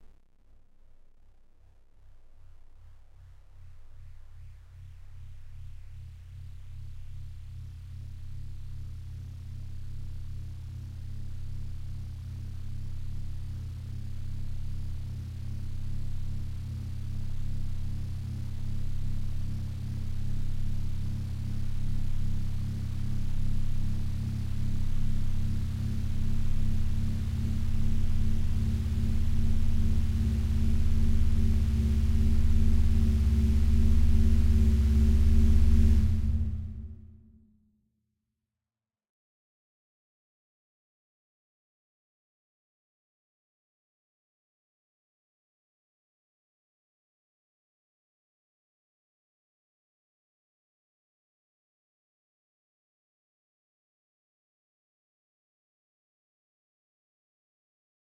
long sweep up fx 2
long sweep up fx usefull for film music or sound design. Made with the synth Massive, processed in ableton live.
Enjoy my little fellows
ambient, atmosphere, effect, electronic, fx, long, noise, pad, sci-fi, sfx, sound-design, strange, sweep, synth, tension, up, uplifter, white